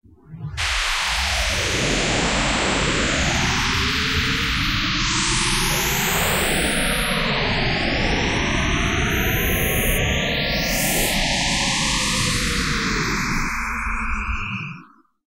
loading core
With this one, I was just playing around a bit with the spectrogram brush.
alien, aura, electronic, reactor, sci-fi, VirtualANS